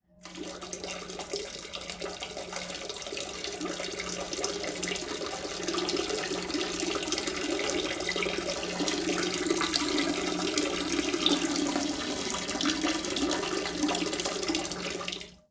man peeing into toilet